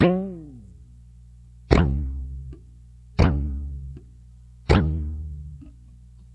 experimenting with a broken guitar string. a series of longer warbling notes, with a wide range of tonal variation-caused by pulling on the broken string at various strengths while plucking it.
notes, experimental, pluck, plucked